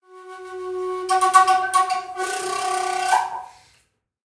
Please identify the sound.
NATIVE FLUTE FIGURE 02
This sample pack contains 5 short figures played on a native north American flute, roughly in the key of A. Source was captured with two Josephson C617 mics and a small amount of effects added. Preamp was NPNG, converters Frontier Design Group and recorder Pro Tools. Final edit in Cool Edit Pro.
aboriginal, ethnic, first-nations, flute, indian, indigenous, native, north-american, woodwind